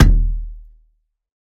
WATERKICK FOLEY - HARM LOW 01
Bass drum made of layering the sound of finger-punching the water in bathtub and the wall of the bathtub, enhanced with lower tone harmonic sub-bass.
foley, kick, percussion, bassdrum